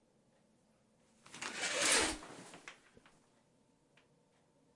opening show curtain
Showers for that that foley game!
denoised, edited, foley, free, h5, high, quality, stereo, zoom, zoom-h5